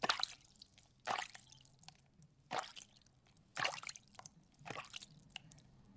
Agua Chapotead
chapoteada, efecto, Agua, golpes